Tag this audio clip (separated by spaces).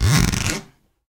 zipper egoless natural scratch sounds noise 0 vol